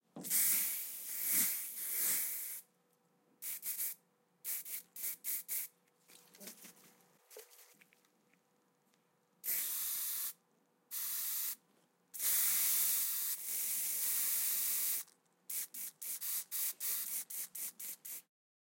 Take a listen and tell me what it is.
SFX - aerosol can spray
Close-pespective recording of an aerosol deodorant can spraying and being shaken. Various spray lengths.
Recorded for a sound design class prac using a Zoom H6 recorder with ZY capsule set to 90º.
aerosol, air, can, deodorant, spray, spray-can, spraying